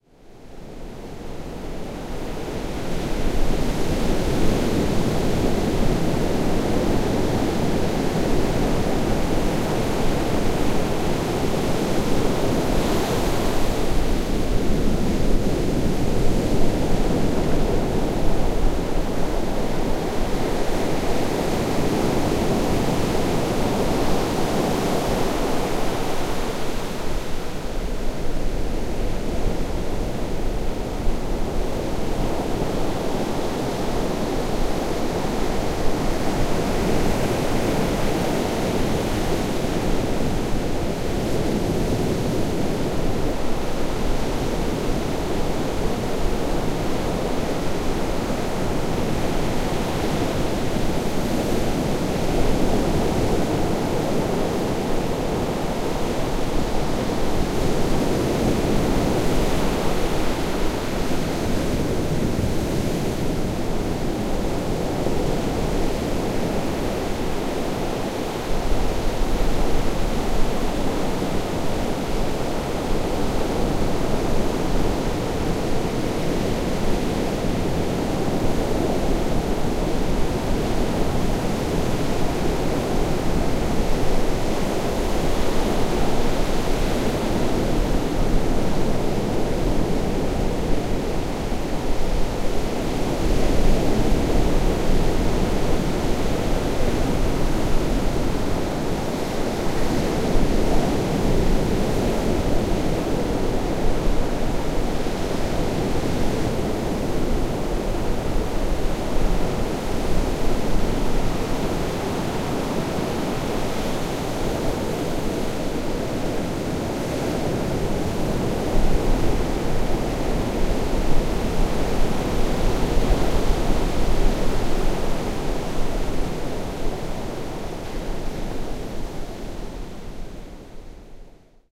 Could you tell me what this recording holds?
Jouburiki Beach,Ternate, Indonesia
Waves crash on the black-sand and jungle-encrusted volcanic cliffs of Jouburiki beach, Pulau Ternate, Spice Islands, Indonesia
tropical, water, black, meditate, breaking-waves, shore, Maluku, beach, Asia, coast, seaside, Ternate, wave, Spice, roar, Pulau, ocean, field-recording, surf, Indonesia, sand, sea, peaceful, waves, island, volcanic, splash, seashore, secluded